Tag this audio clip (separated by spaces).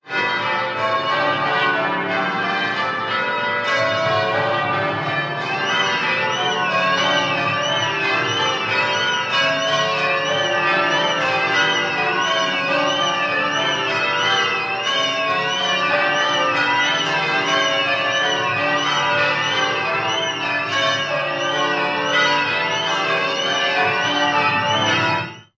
alarm bell car cathedral fugue